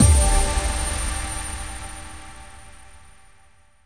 this is a new series made from sampling acoustic guitar and processing everything in renoise multiple resampling also layered with various nature sounds diy bells, kicks, and pads.
abstract, achievement, bright, button, digital, effect, electric, element, energy, future, futuristic, game, level, level-up, menu, notification, sci-fi, sfx, sound, sound-design, soundeffect, ui, up, user-interface